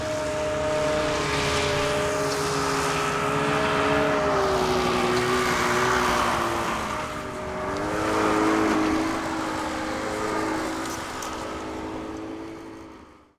snowmobiles pass by nearby short